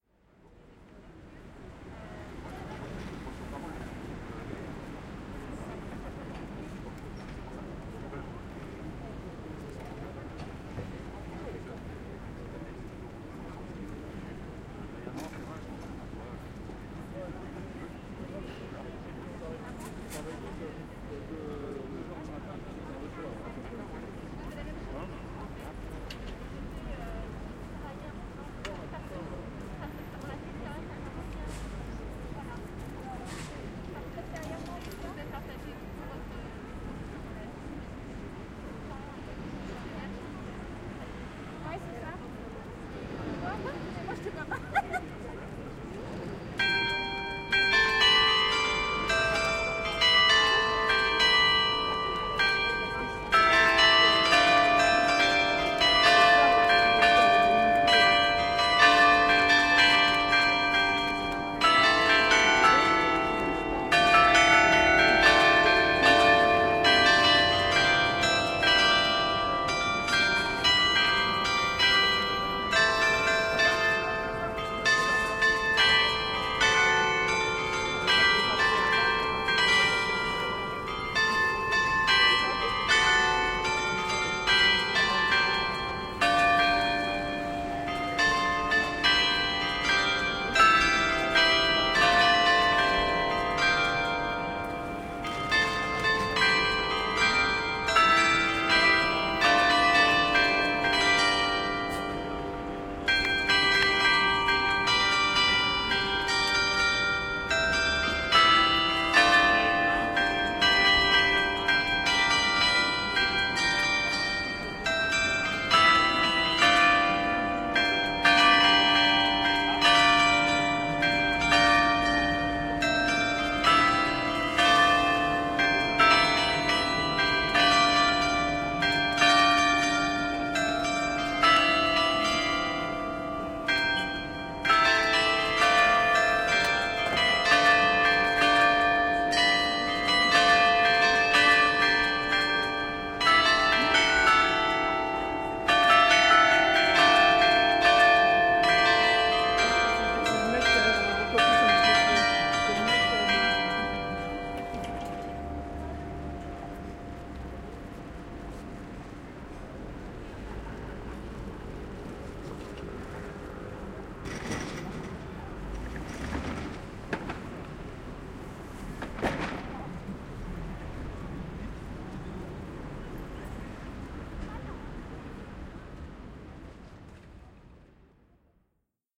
LS 34612 FR Carillon
Lunchtime ambience and close carillon.
I made this recording in a square of Nanterre city (suburb of Paris, France),at the beginning of lunchtime.
One can hear some office workers walking and talking, and in the background, sounds from the surrounding city. At 0’46’’, chimes from the bell-tower right in front of me start to ring. Nice to hear it, isn’t it ?
Recorded in September 2020 with an Olympus LS-3 (internal microphones, TRESMIC system on).
Fade in/out and high pass filter at 160Hz -6dB/oct applied in audacity.